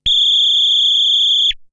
moog mod filter3
Moog Prodigy modulation filter sounds
Recorded using an original 1970s Moog Prodigy synthesiser
70s, effect, prodigy, retro, sci-fi, space, synth